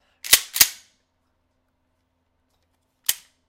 shotgun rack
Racking and dry-firing of my Mossberg 590a1
gun, rack, reload, shotgun